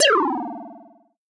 sonokids-omni-06
Part of my unfinished pack of sounds for Sonokids, a funny little synth sound dropping in pitch.
blip, digital, sonokids, synth